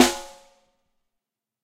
Ludwig Snare Drum Rim Shot
Snare, Ludwig, Drum, Shot, Rim